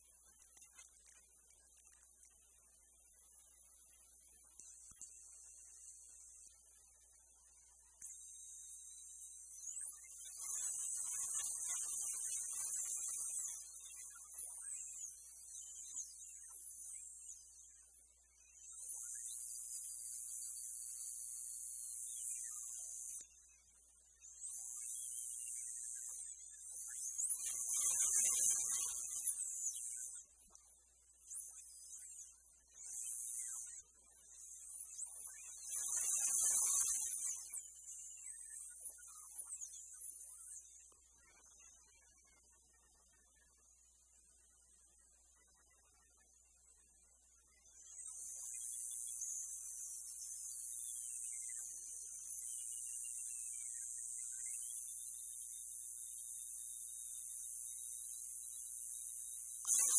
One minute of radio interference - complete with some heterodyne whines.
I built a simple AM radio transmitter.
It only broadcasts about 1 foot, but these are some sounds that I made come out of a cheap AM radio, just by fooling with the frequencies - or putting my hand near some components.
More later.
effect, heterodyne, interference, radio, soundeffect, static